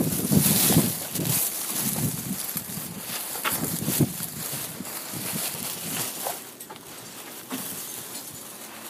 Walking through leaves
leaves, walking